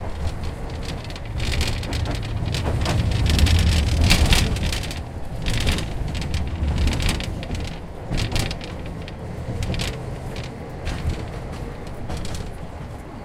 Clatter of glass in the tram window.
Recorded: 2012-10-25.